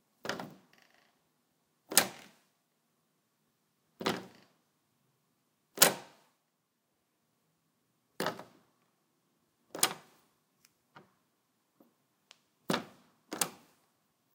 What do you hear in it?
Julian's Door - turn doorknob without latch
Turning my doorknob without engaging the latch